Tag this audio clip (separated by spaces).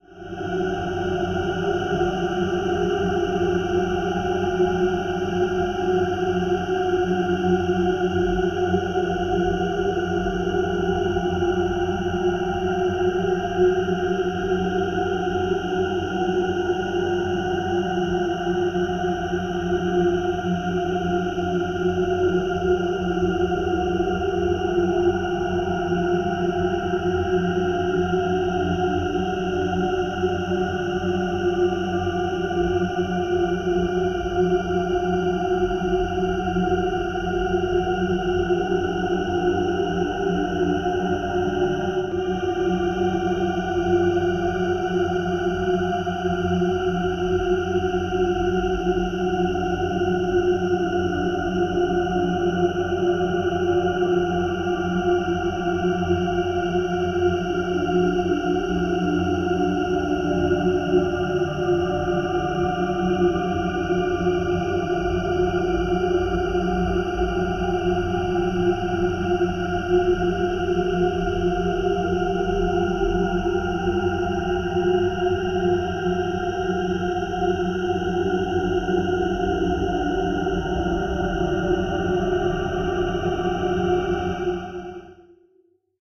multisample diffuse crickets cosmic outer-space soundscape artificial pad ambient space drone celestial noise experimental